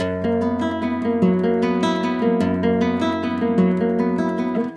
Nylon Guitar Loop B-1
Acoustic guitar loop.
stereo, strings, instrumental, guitar, loop, nylon-guitar, acoustic-guitar